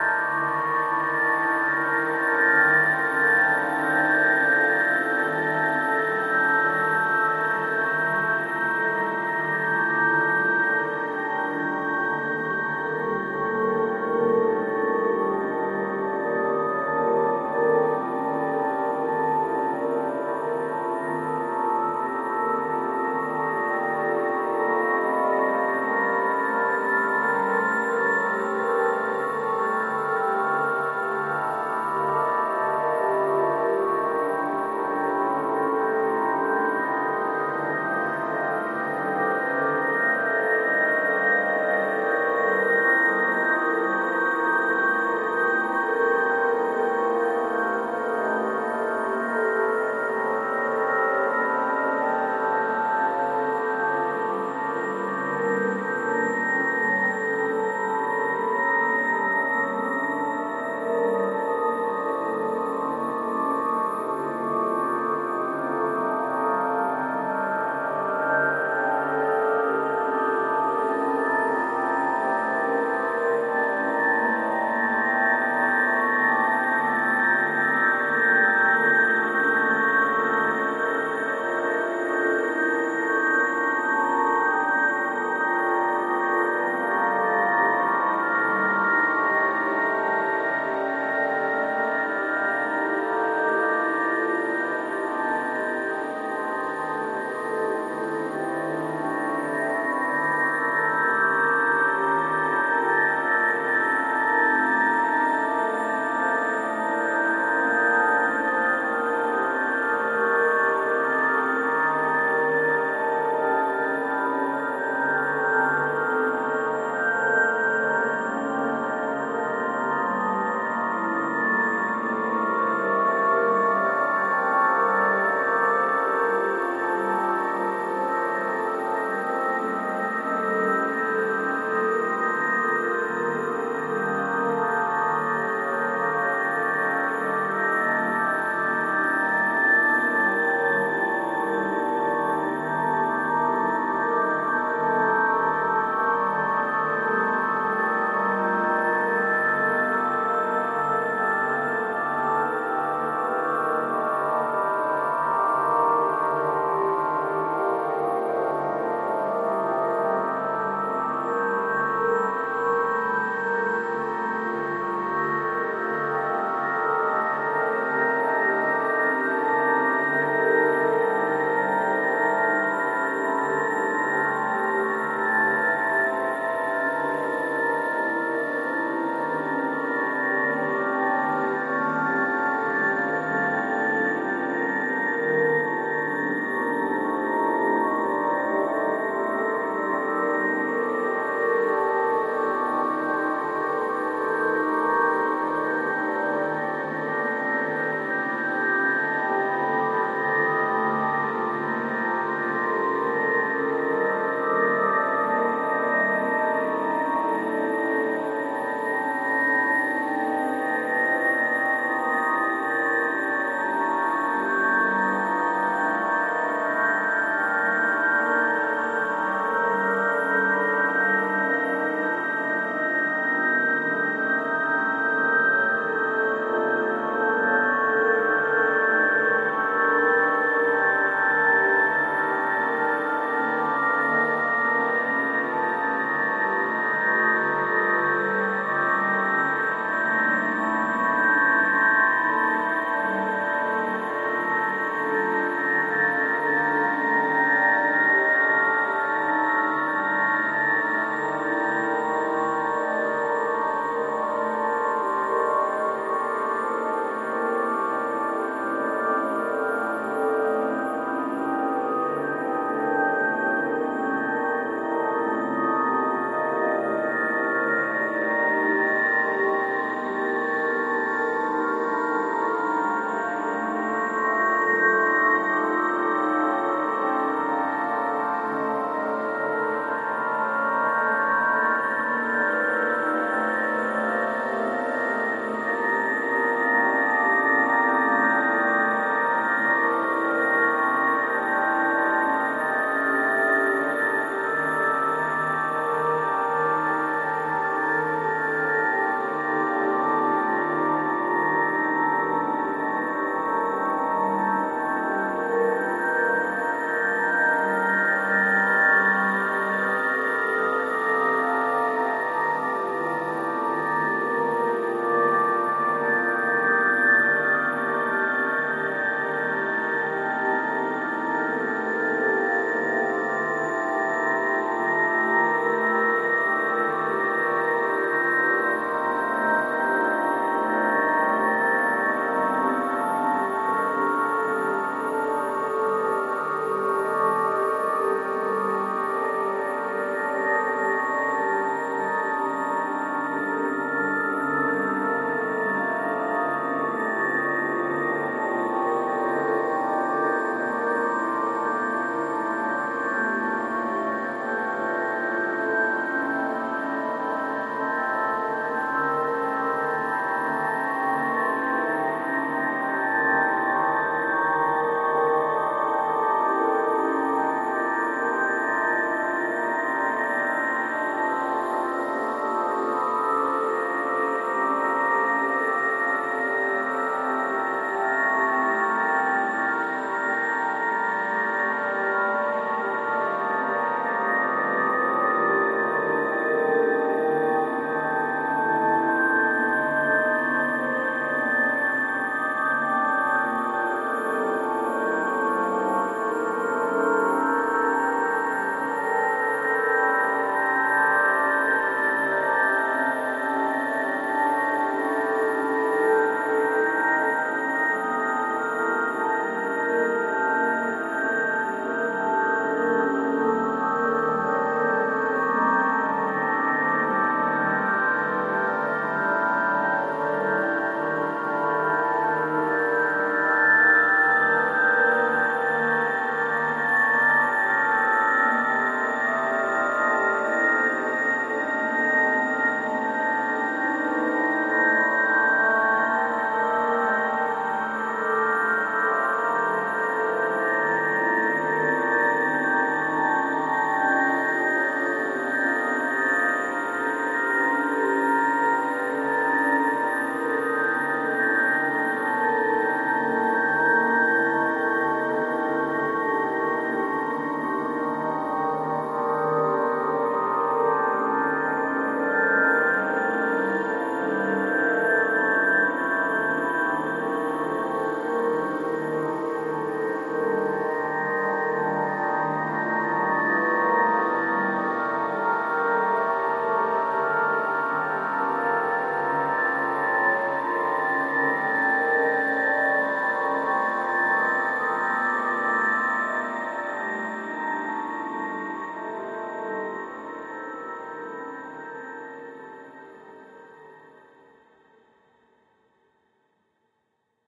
Magical Atmosphere (Ambient)
Magical Ambience created in Ableton with dreamy sustained E-Piano layers + lots of reverb and shimmer.
atmospheric, ambience, noise, white-noise, atmosphere, soundscape, ambient, magical, spell, enchanting